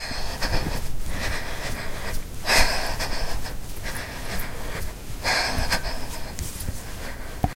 3 breath sport
You can hear the breath of a female running. It has been recorded in a recording classroom at Pompeu Fabra University.
breathing, running, UPF-CS14, run, campus-upf, breath